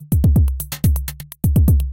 Rhythmmakerloop 125 bpm-52
This is a pure electro drumloop at 125 bpm
and 1 measure 4/4 long. A variation of loop 50 with the same name. Some
toms were added. It is part of the "Rhythmmaker pack 125 bpm" sample pack and was created using the Rhythmmaker ensemble within Native Instruments Reaktor. Mastering (EQ, Stereo Enhancer, Multi-Band expand/compress/limit, dither, fades at start and/or end) done within Wavelab.
125-bpm, drumloop, electro